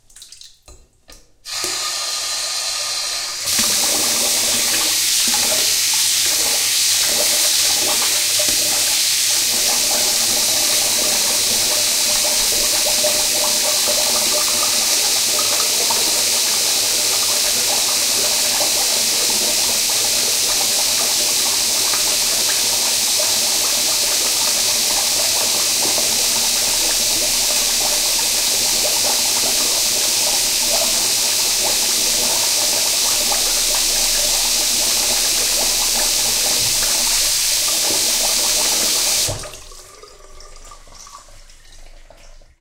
Running water bathroom
Recorded with a crude DIY binaural microphone and a Zoom H-5.
Cut and transcoded with ocenaudio.
bath, bathroom, crude-binaural, drain, drip, home, sink, splash, water